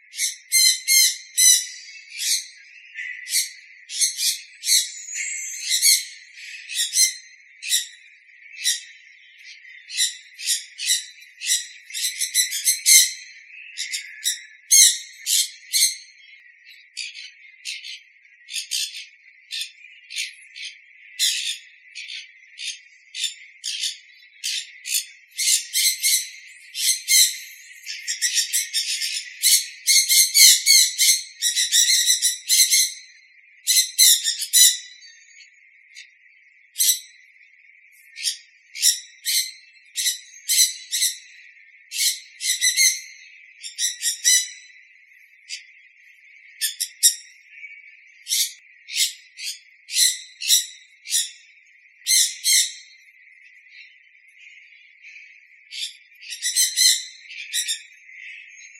Calls from two Sun Conures. This recording has been filtered to remove people talking, but the bird calls are not affected. Recorded with an Edirol R-09HR.
zoo, rainforest, jungle, parrot, parakeet, aviary, exotic, tropical, conure, bird, birds